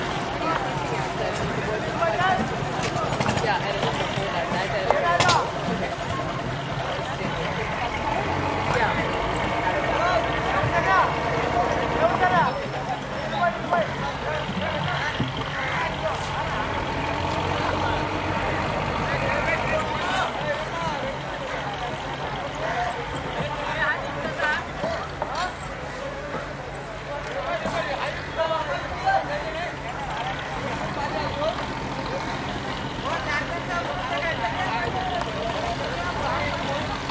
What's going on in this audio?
fishermen busy pier near fish market shouting voices throaty boat engines waves and crows1 India
fish, market, crows, engines, busy, India, throaty, waves, near, shouting, fishermen, pier, voices, boat